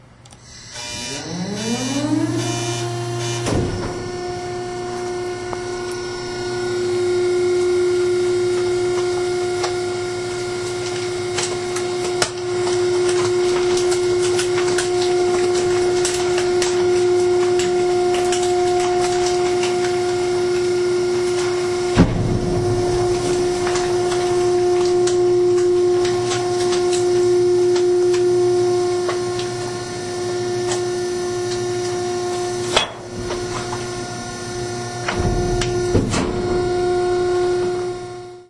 Trash Compactor
A monstrous machine
makes haste
with discarded waste.
garbage, crush, industrial